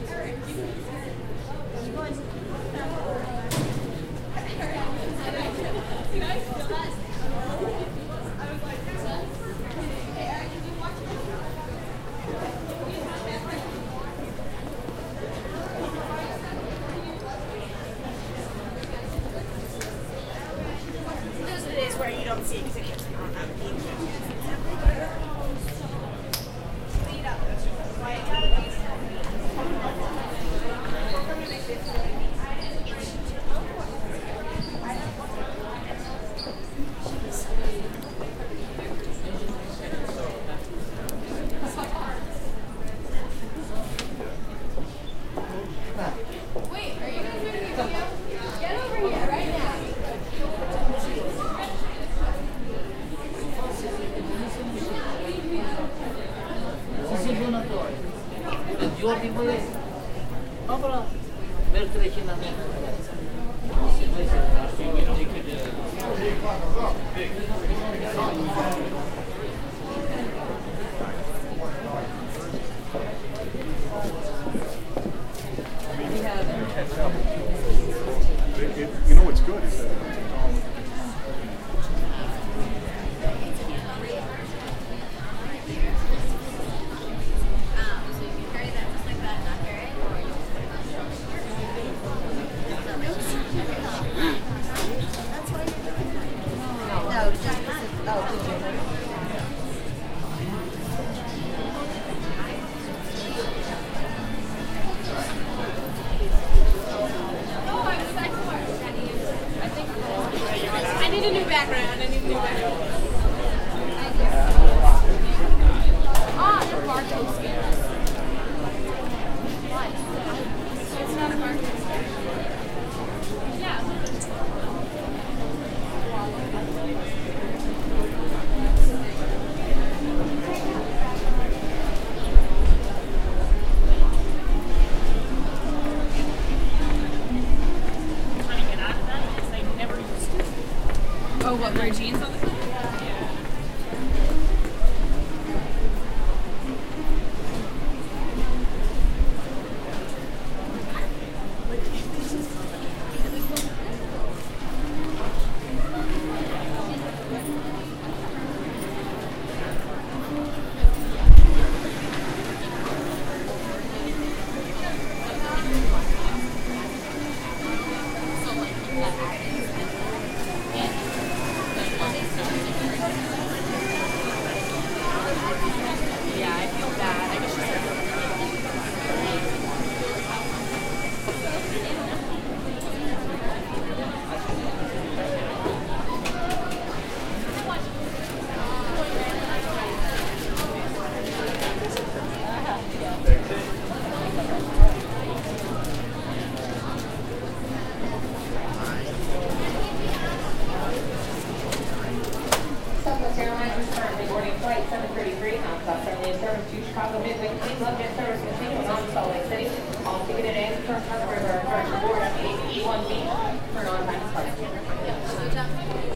This is a recording I made with a Zoom H4N while waiting for a flight at Logan Airport in Boston. It's fairly long. and I wandered around the departure lounge with the recorder running, just picking up whatever was going on. There are conversations, miscellaneous chatter and an airport announcement.
announcement, airport, field-recording